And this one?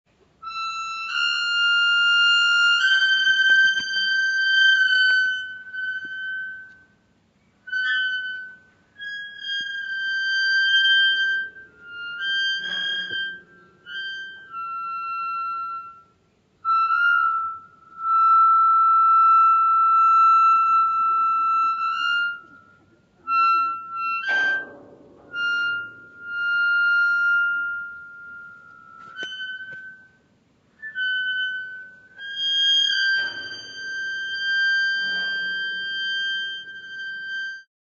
Israel Hek bip
Iron gate opening slowely somewhere in a nature reserve in Israel
Gate, iron, israel, Metal